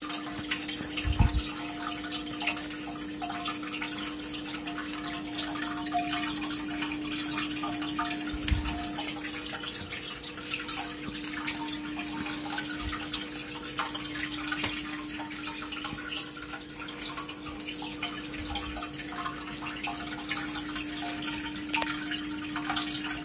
turtle water
turtle filter noise
river, filter, water